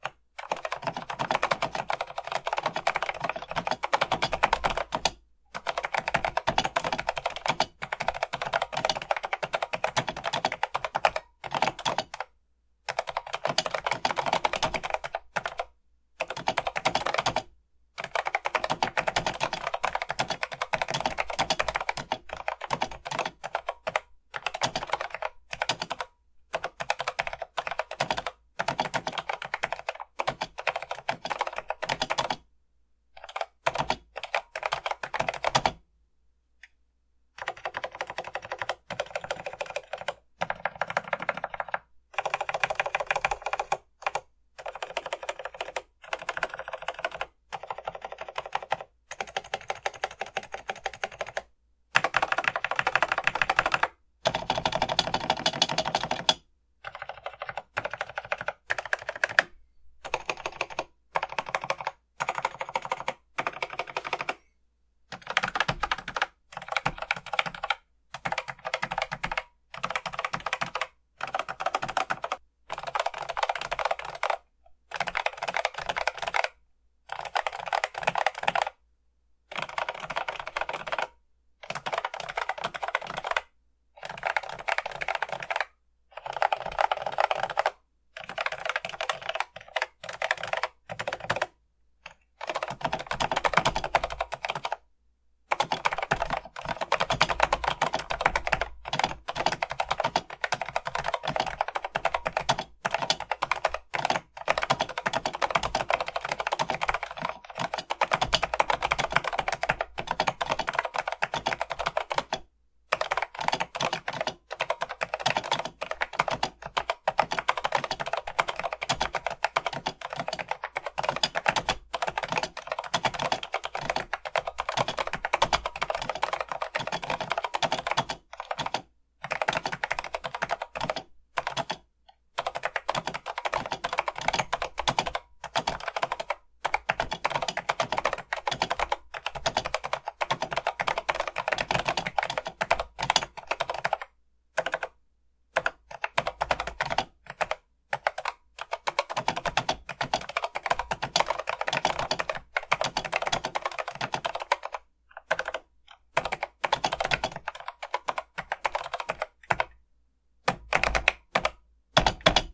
Typing sounds: PC-1600 XT keyboard
Typing test of a PC-1600 keyboard. Switches are (probably) Mitsumi KCM. I have done my best to eliminate the sound of the stabilizer wires but the space bar is difficult and I haven't been able to silence it.
keyboard, keystroke, mechanical, type, typing, vintage